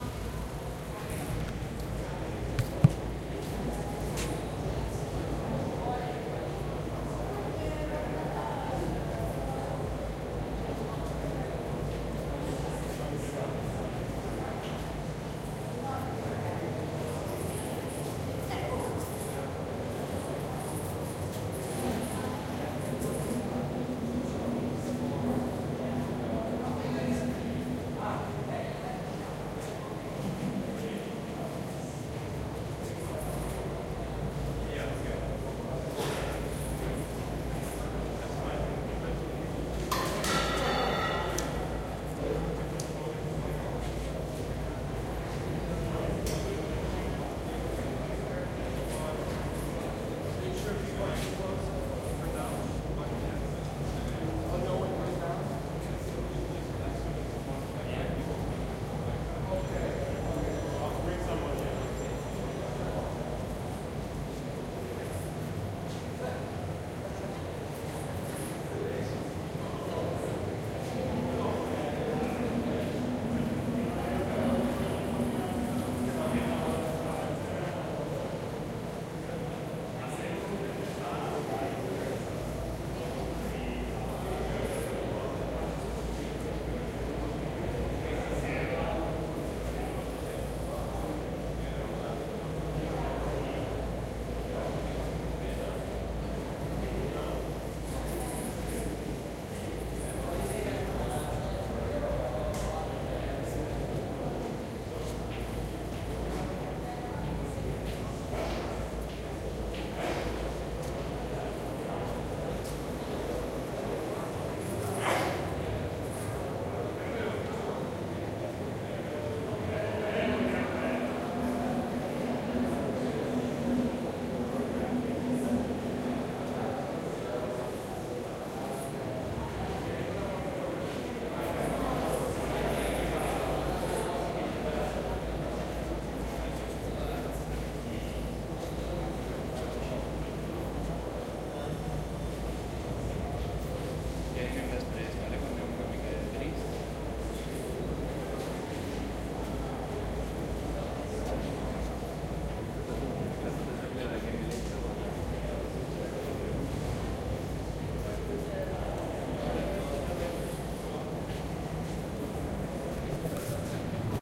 recorded on the atonal festival in berlin kraftwerk. nice athmospheric soundscape